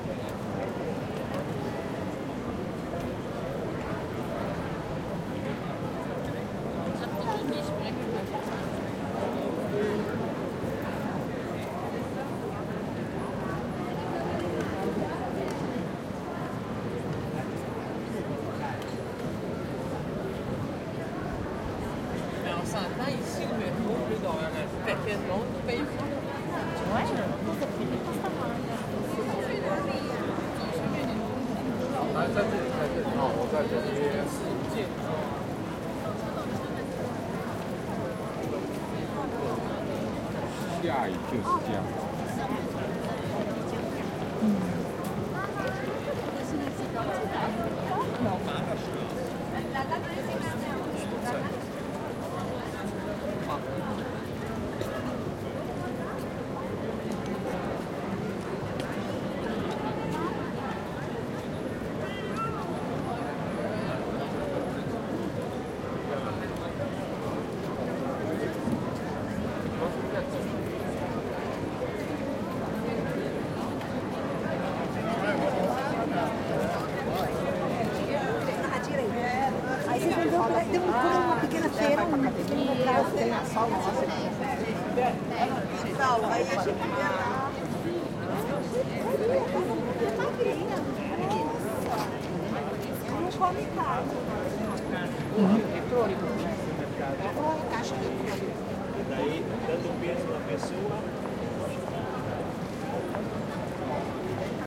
140812 Vienna StefansplatzAida F
4ch surround recording of the Stefansplatz in Vienna/Austria, near the Café Aida. It's a weekday afternoon in summer, lots of people are walking around the recorder on diverse shopping errands.
Recording conducted with a Zoom H2.
These are the FRONT channels, mics set to 90° dispersion.